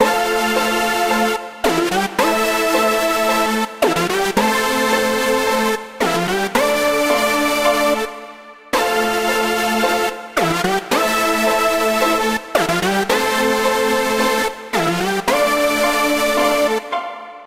Strings n Synths melody.
suspenseful, theater, and dramatic sample melody that would be a good sound for any project.
lead, melody, Strings, Violin